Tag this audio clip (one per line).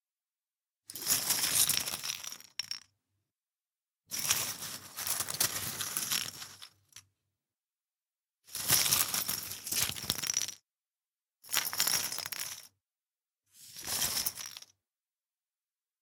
abstract
design
foam
plastic
polyfoam
sfx
sound
styrofoam